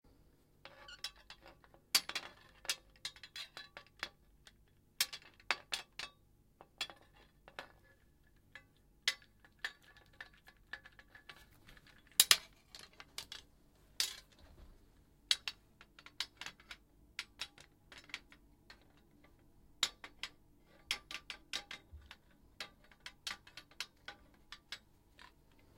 Metal rattling
A rattling sound of metal against metal. Can be used for equipment in movement, I used it for soldiers carrying metal weapons.
gear, weapons, movement, rattle, rattling, hitting, equipment